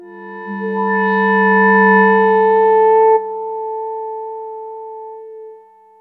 tubular system C3
This sample is part of the "K5005 multisample 11 tubular system" sample
pack. It is a multisample to import into your favorite sampler. It is a
tubular bell sound with quite some varying pitches. In the sample pack
there are 16 samples evenly spread across 5 octaves (C1 till C6). The
note in the sample name (C, E or G#) does not indicate the pitch of the
sound. The sound was created with the K5005 ensemble from the user
library of Reaktor. After that normalizing and fades were applied within Cubase SX.
experimental, reaktor, tubular, bell, multisample